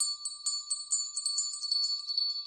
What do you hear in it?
this sample is a remix of
by user 56891b
i just added delay and filter